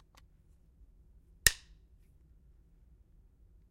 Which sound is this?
Clacking two drum sticks together